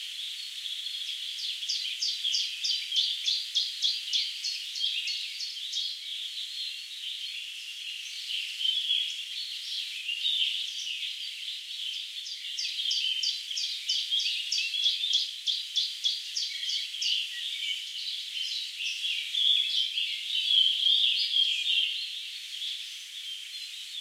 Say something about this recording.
Forest Birds singing.
Recorded by ZOOM H1.
Correction by Logic Pro X.
Recorded in Krasnodar Krai.
Krasnodar Krai is located in the southwestern part of the North Caucasus and borders with Rostov Oblast in the northeast, Stavropol Krai and Karachay-Cherkessia in the east, and with the Abkhazia region (internationally recognized as part of Georgia) in the south. The Republic of Adygea is completely encircled by the krai territory. The krai's Taman Peninsula is situated between the Sea of Azov in the north and the Black Sea in the south. In the west, the Kerch Strait separates the krai from the contested Crimean Peninsula, internationally recognised as part of Ukraine but under de facto Russian control. At its widest extent, the krai stretches for 327 kilometers (203 mi) from north to south and for 360 kilometers (220 mi) from east to west.
ambiance, ambience, ambient, bird, birds, birdsong, environment, field-recording, forest, morning, nature, park, peaceful, singing, spring